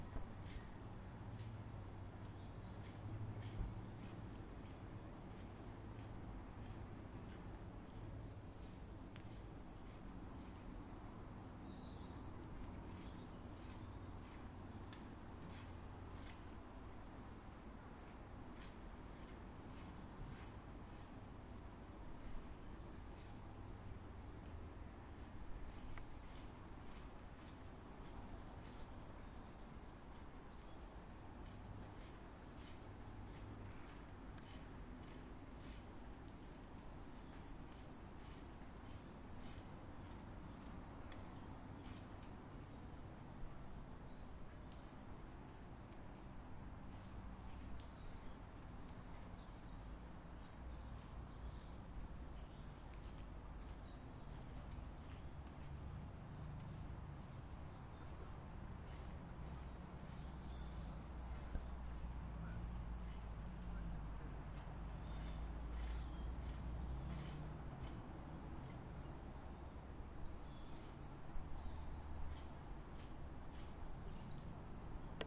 Park Ambience
Autumn evening in the country side